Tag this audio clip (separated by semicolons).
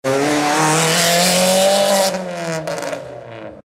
gear; racing; automobile; moving; fast; vehicle; race; engine; driving; race-car; vroom; car; accelerating; speeding; motor; revving; drive; screeching; tires